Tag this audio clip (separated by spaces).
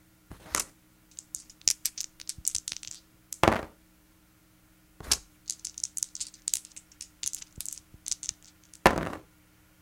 game rolling die dice roll board